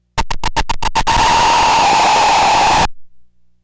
Weird static build I made on Korg EMX, noise reduced on Audacity